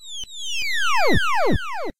This sound was created with a Buzz machine called Sinus. It was created by Snapper4298 for our entry for the first collab dare.
This sound was incorporated in the piece early on and on a certain section, running through a delay echo, it reminded me of seagull cries. Which led me to later include a stretched seagull sound in the piece.
sinus, bleep, Buzz, harsh, synthetic, electronic, simple